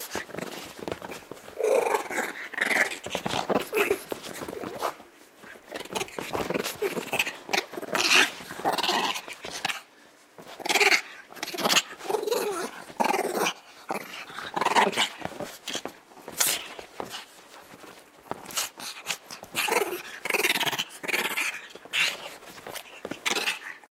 Small dog growling
The growling of my little Pekingese when she is playing with a sock on the couch.
dog, dog-growl, growling, OWI, Pekingese, playing, pug, sfx, small-dog, sound-effect